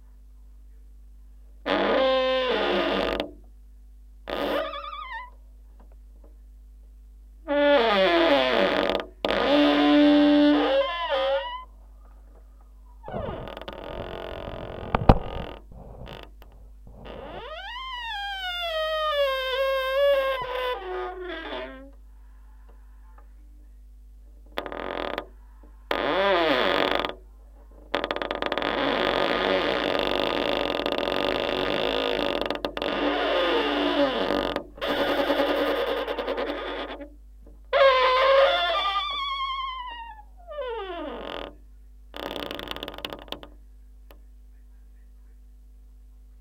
Recording of a very annoying squeaking kitchen door, using a home-made contact mic stuck to the door with heavy duty sticky-tape.Sorry, there is a bit of background noise on the recording coming from the TV in the next room. Should still be usable, though.afterwards I oiled the hinges on the door as it was driving me mad :)